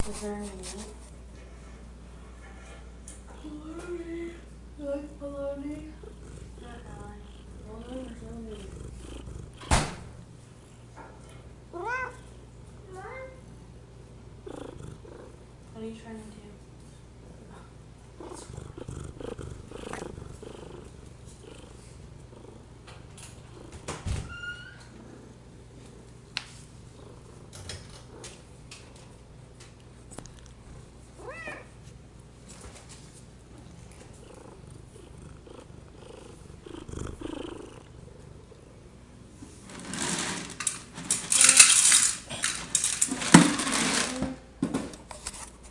Trying to record a cat doing stuff but getting interupted.
cat, interuption